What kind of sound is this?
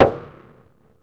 wooden tock fuzz
tap on the wooden resonance body of my Yamaha Piano. mixed with Fuzzplus Effect from Audio Damage
acoustic, fuzz, hit, piano, resonance, warm, winter, wood